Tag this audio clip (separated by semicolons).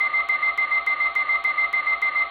annoying; loopable; beeps; electric; effect; Computer; processing; sound; Repeating